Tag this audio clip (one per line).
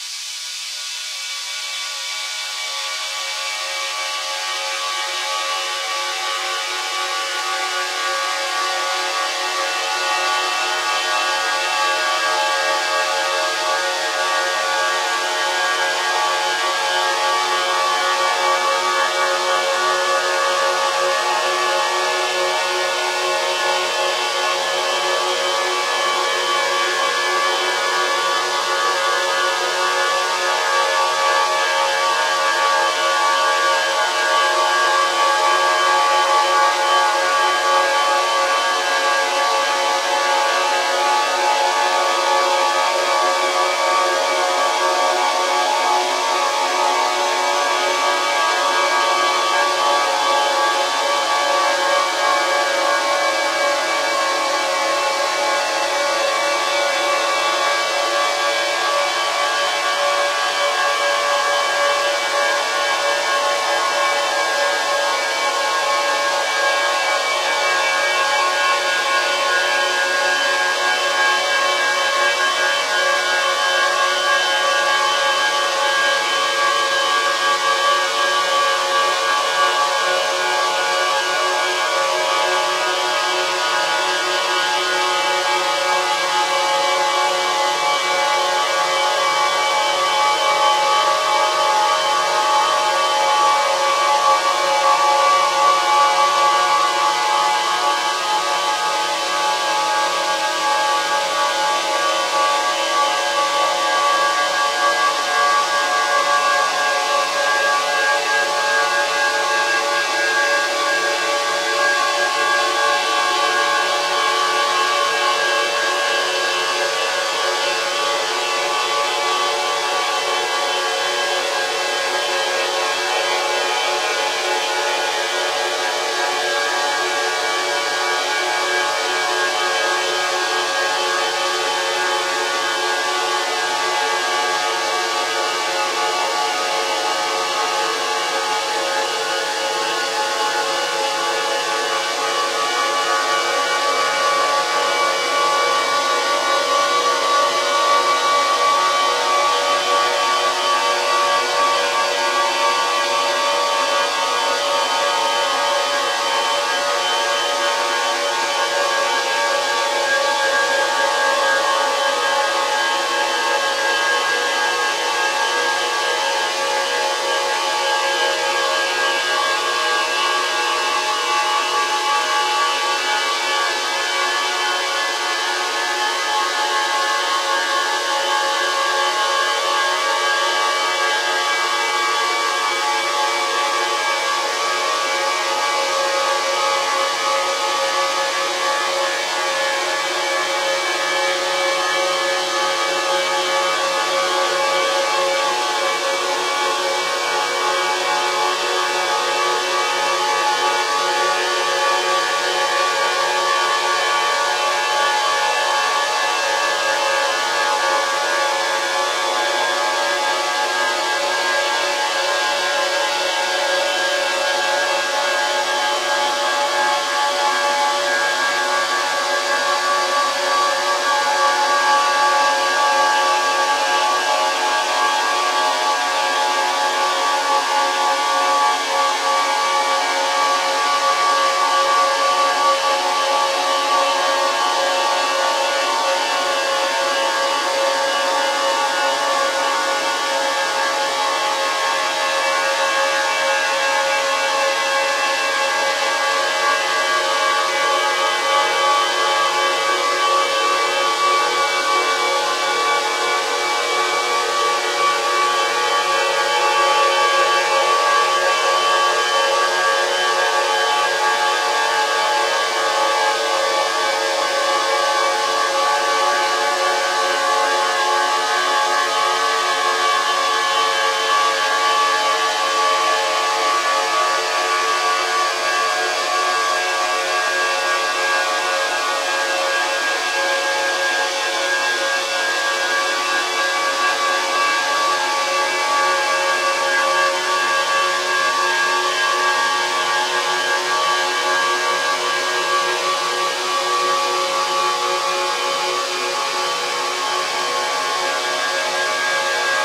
reverb
audacity
noise